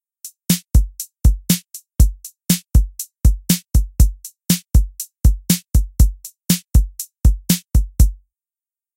Rock 808 beat
Here's basic Rock beat using an 808 drum sound with no effects add.
808, rock